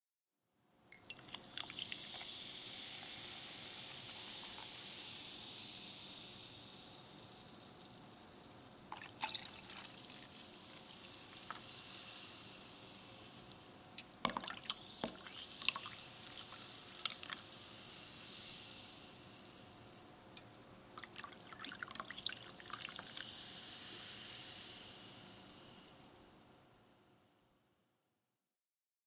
Pouring Soda into Glass
drank, drink, drunk, soda